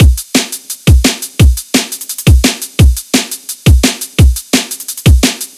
DNB drums 172bmp
dnb, drumandbass, drumnbass